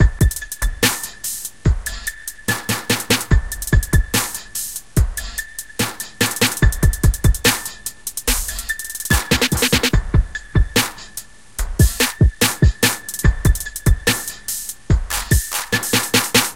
10 bars at 145 BPM. Dirty trap beat. Every bar is different...I found an old demo with tons of air and dirt and put some new clean drums over it and a bell-like sound on top. No compression.